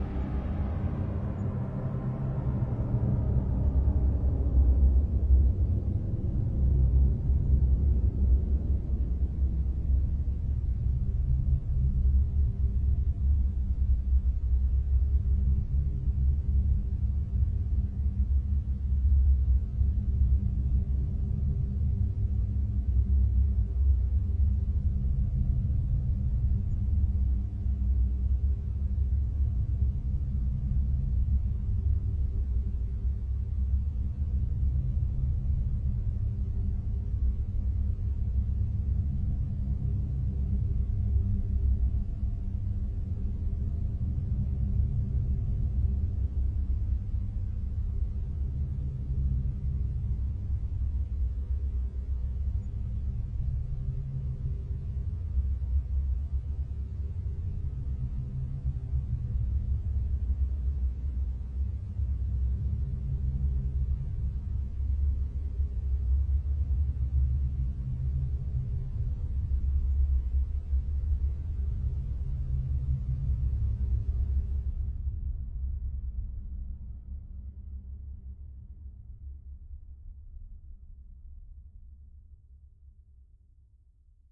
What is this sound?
Space Pad Low and Long
Created by layering strings, effects or samples. Attempted to use only C notes when layering. Stretched in Audacity / CoolEdit, filtered some high-end noise.
Bass, Growl, Layered, Pad, Rumble, Stretch, Stretched, String, Strings